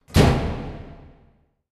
banging on my dryer, with a bit of re verb added